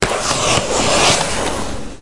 Slide Object
Sliding a smartphone on a plastic surface. Good for super agents sliding small electronic devices to one another during the boss battle.
Recorded with Zoom H2. Edited with Audacity.
away, catch, fast, lose, movement, object, quick, slide, small, smartphone, tiny